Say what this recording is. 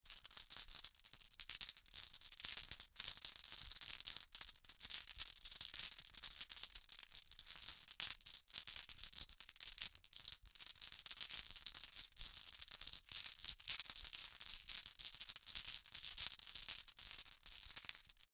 Glass marbles being shuffled around in cupped hands. Dry, brittle, snappy, glassy sound. Close miked with Rode NT-5s in X-Y configuration. Trimmed, DC removed, and normalized to -6 dB.
glass, hand, marble, shuffle